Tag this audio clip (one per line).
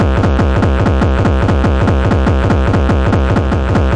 lo-fi
extremist
future
sound-design
noise
digital
sci-fi
electronic
glitch
overcore
loop
core
soundeffect
anarchy
experymental
breakcore
skrech